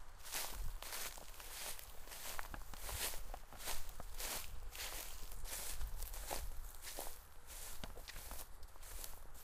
steps in the grass
sound of walking tru the big grass, H4 stereoo rec
steps, walk